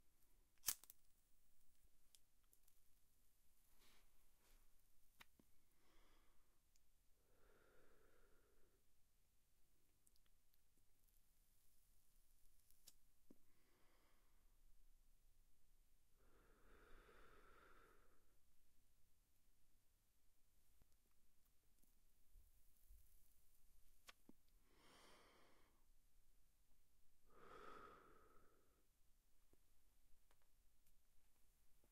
Smoking! Ignition, Inhale, Exhale, Close
Quick recording I did of someone smoking. Lighter, inhale, exhale. Recorded from close distance with Tascam DR-05
exhale, smoking, lighter, cigarette